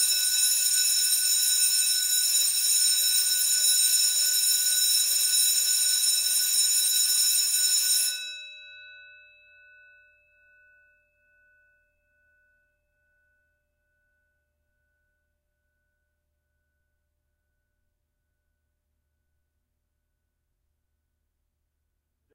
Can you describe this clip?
School bell of Centro Escolar Vale Lamaçaes Portugal